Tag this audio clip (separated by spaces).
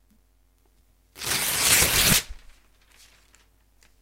paper tearing